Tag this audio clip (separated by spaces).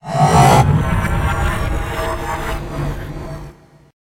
game metallic transformer abstract moves morph glitch transition organic drone dark transformation futuristic hit impact opening woosh destruction cinematic